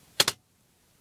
Computer Keyboard Spacebar
Pressing the spacebar on a computer's keyboard.
computer, key, keyboard, keys, space, spacebar, type, typing